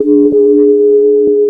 semiq fx 2
freaky, sounddesign, fx, digital, sound, electric, industrial, glitch, lo-fi, abstract, sci-fi, sfx, soundeffect, effect, noise, electronic, experimental, loop, soundesign, sound-design, sound-effect, synth, weird, efx, machine, strange, bleep, future